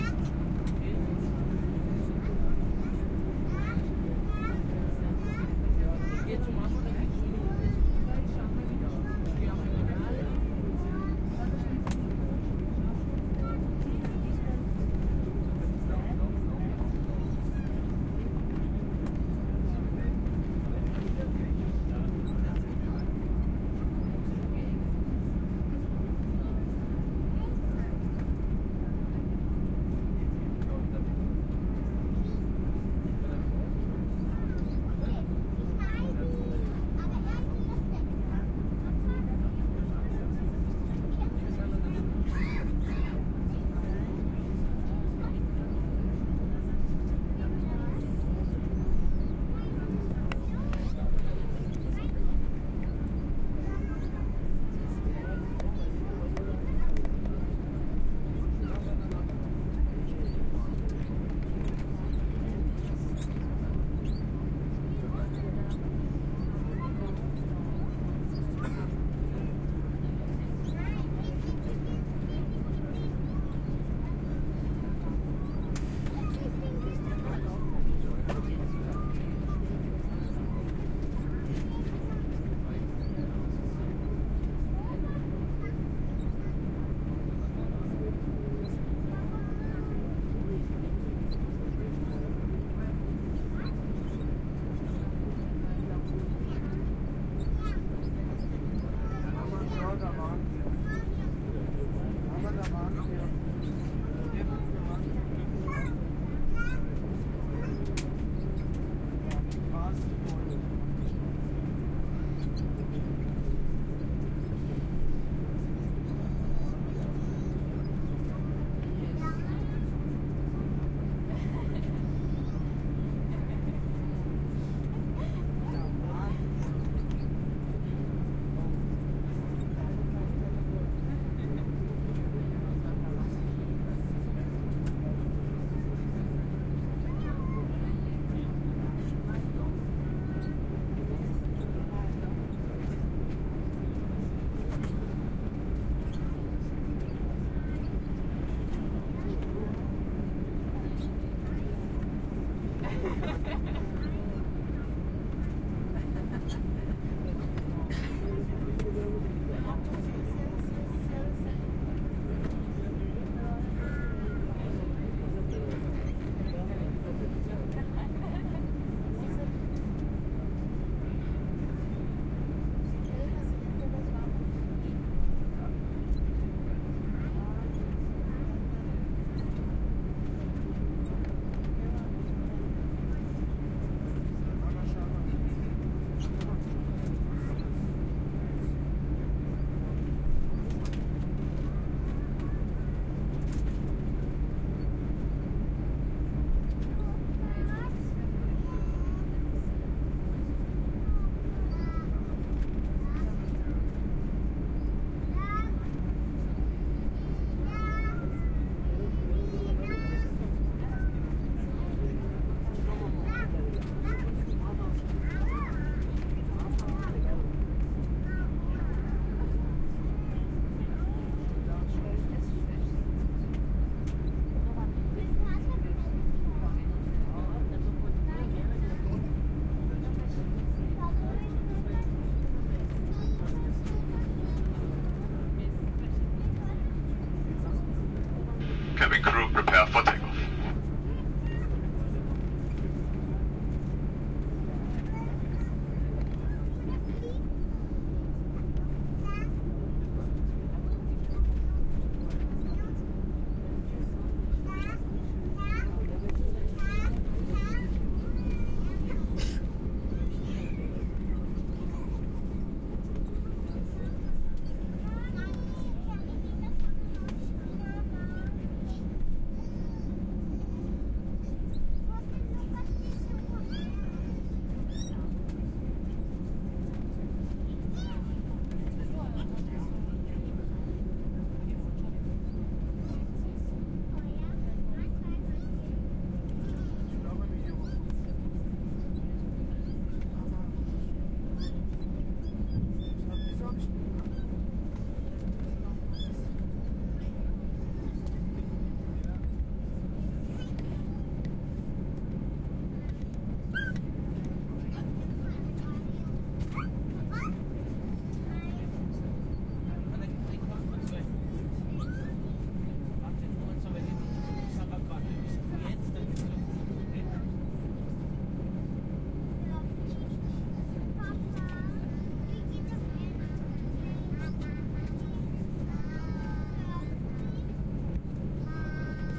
Inside the plane, just before taking off. You can hear the pilot sayin' "Cabin crew, prepare for take off"